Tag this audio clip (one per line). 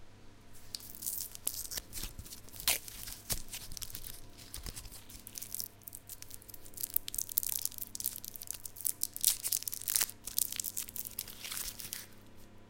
candy
caramel
cellophane
sweet
unwrapping